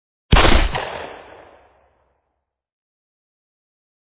Gunshot from glock